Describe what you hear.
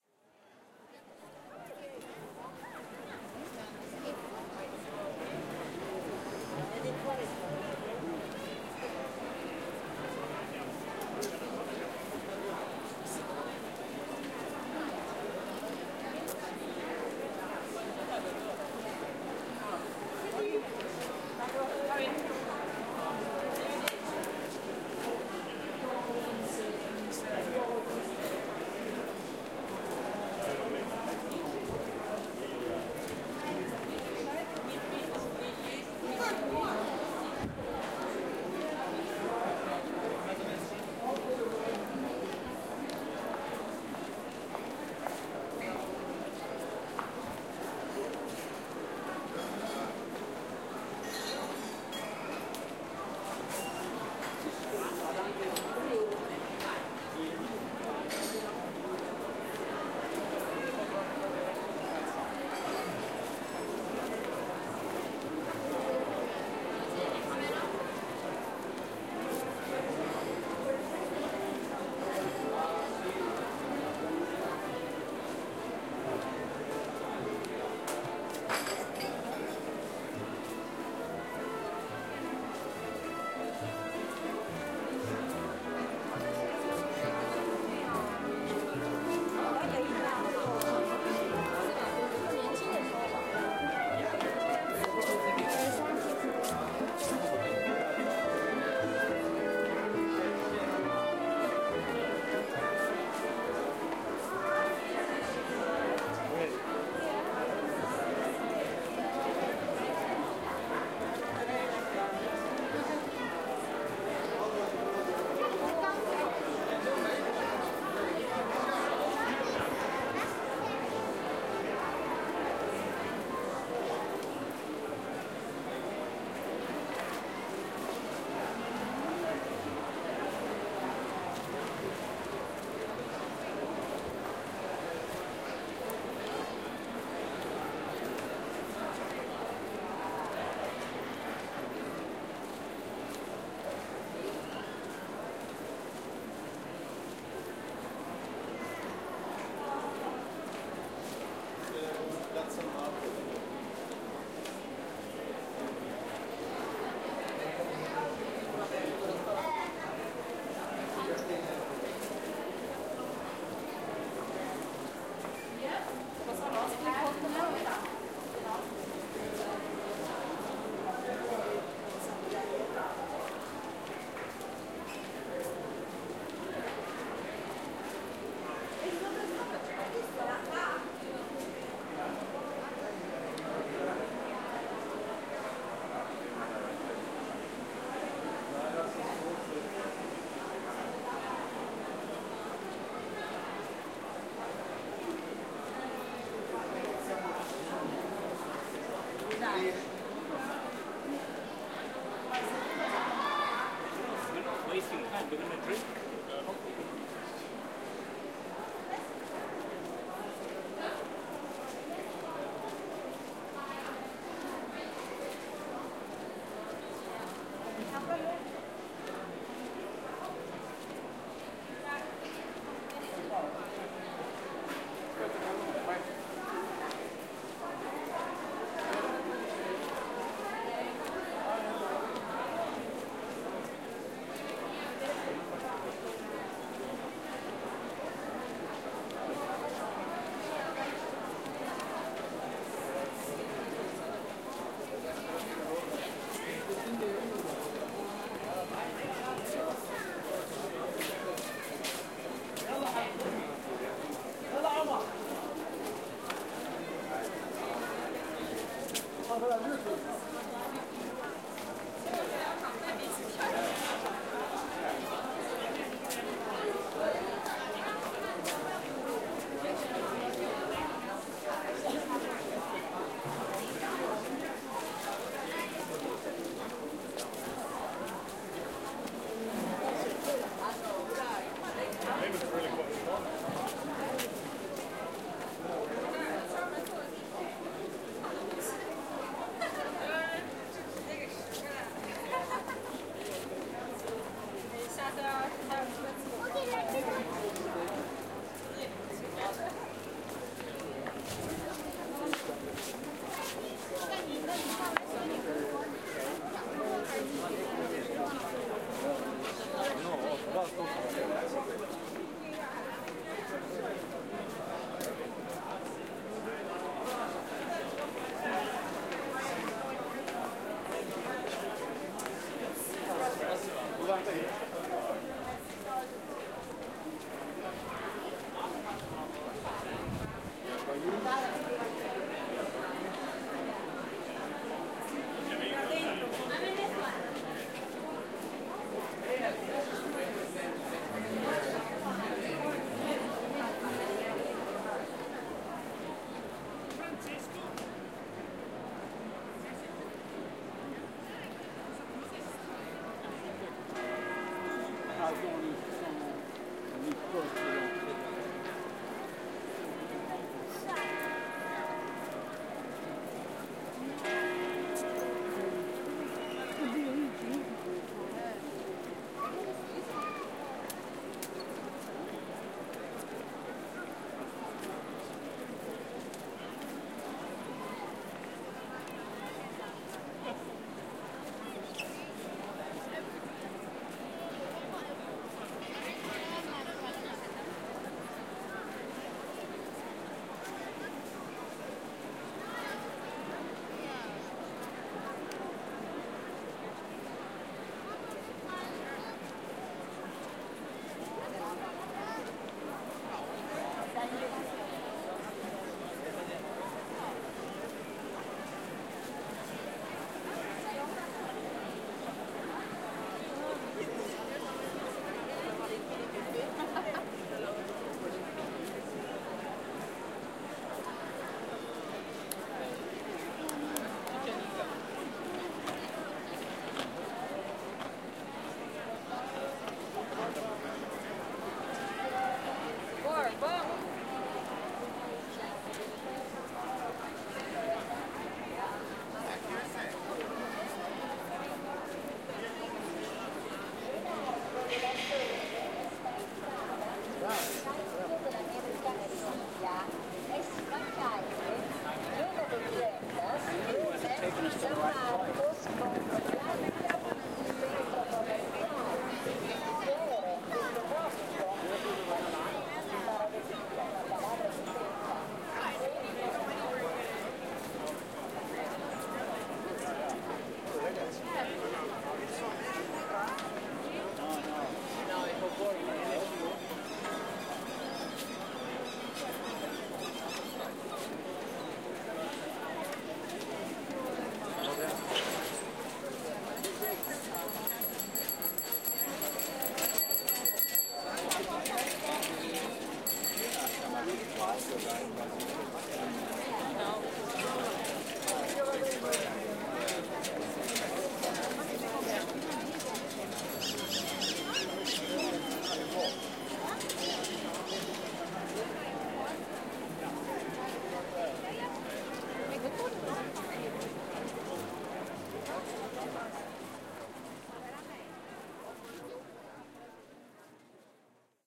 130823- piazza s marco II
... walking around piazza s marco...